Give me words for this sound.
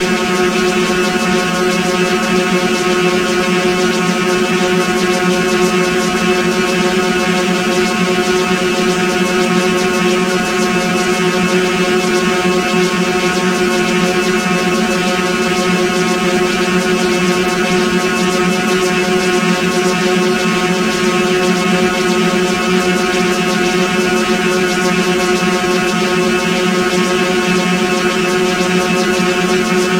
Res Highdrone
Part of assortment of sounds made with my modular synth and effects.
drone, high, noise, resonant